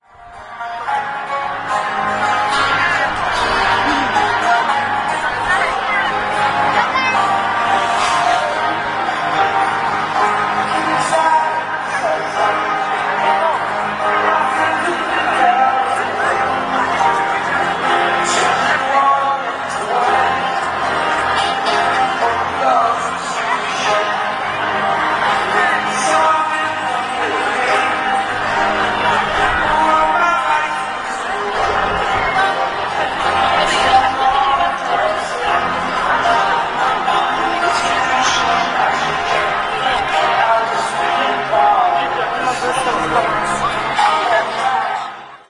koncert marcin
11.11.09: between 19.30 and 21.00; the concert during the annual fair on Saint Marcin street name day (in the center of Poznań/Poland); people selling funny objects, food, souvenirs and speciality of that day: rogale świętomarcińskie (traditional croissants with white poppy filling.
no processing (only fade in/out)
voices, selling, street, people, poland, fair, market, poznan, music, saint-marcin-street-name-day, annual, buying, crowd, concert, field-recording